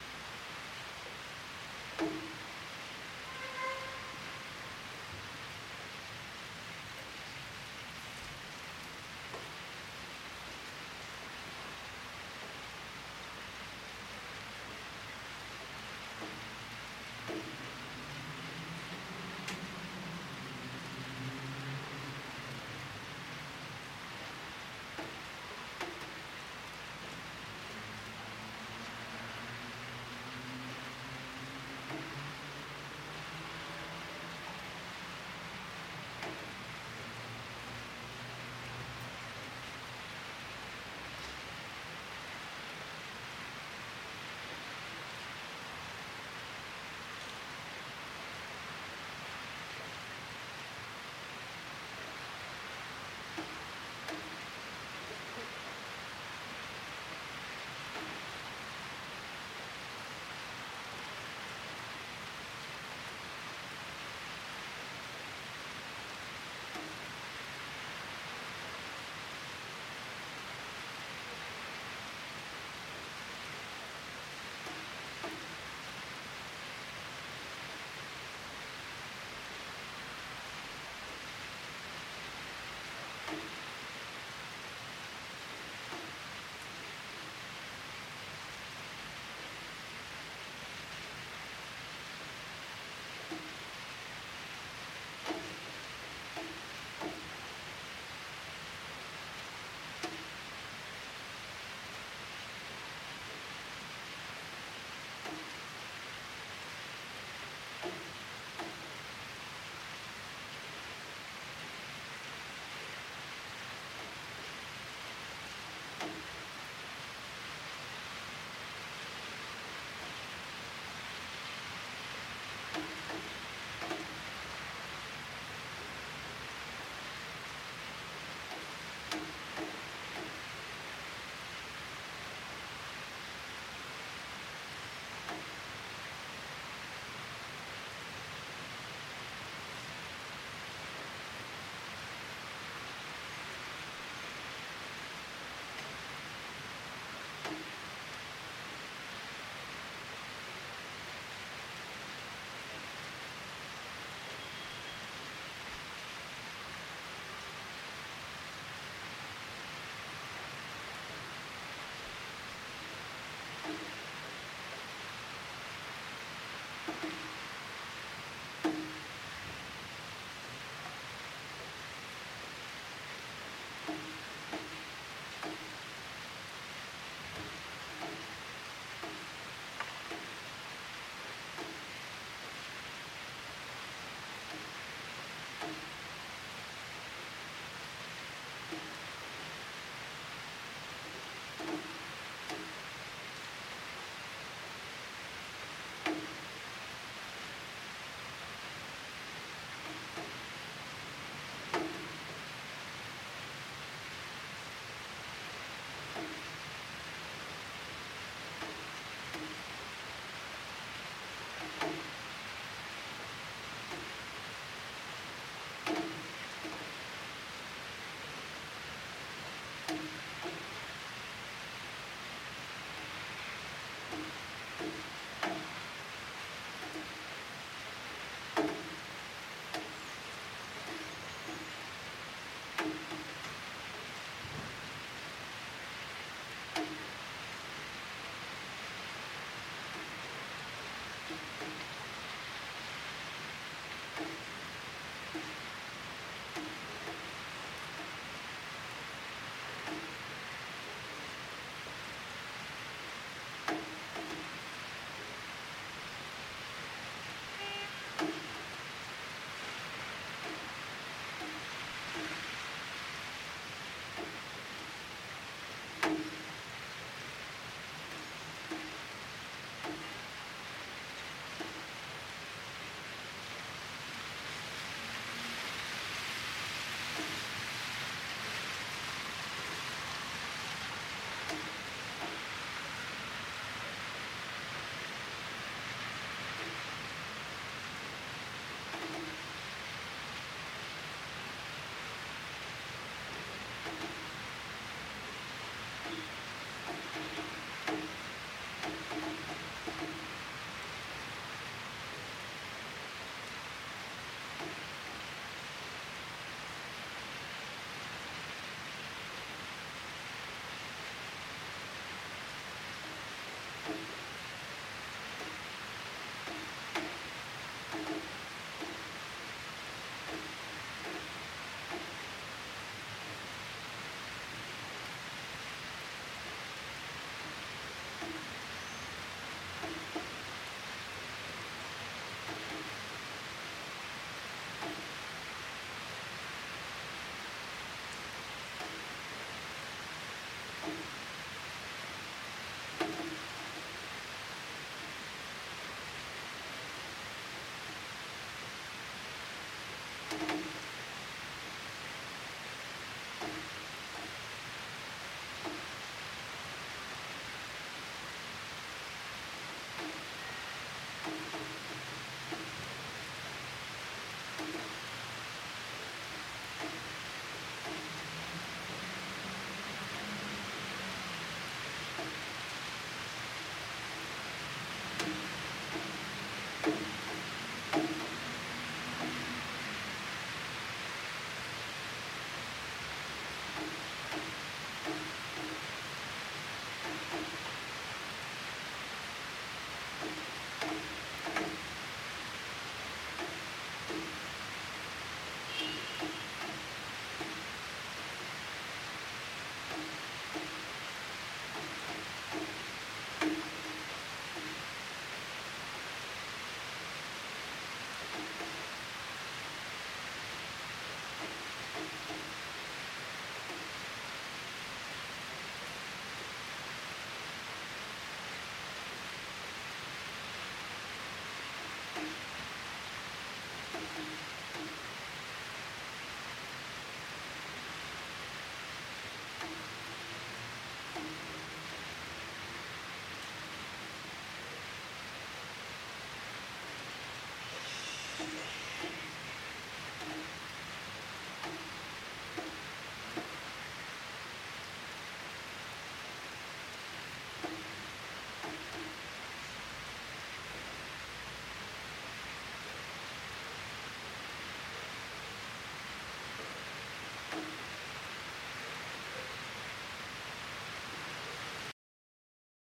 City Rain
Recorded in St. Petersburg, Russia
Urban, City, Rain, Environment